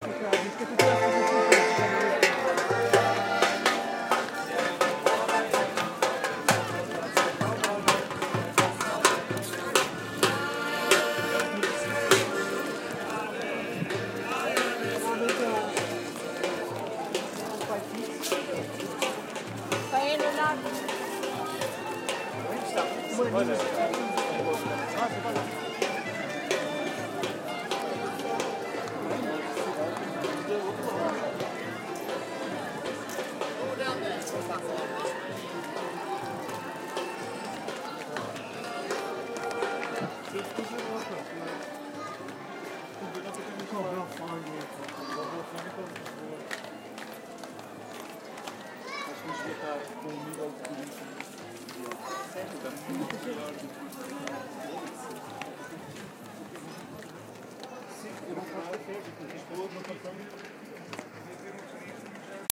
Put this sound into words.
ljubljana musicians1
Musicians playing near the Butcher's bridge in Ljubljana. Spetember 2012.
Ljubljana, musicians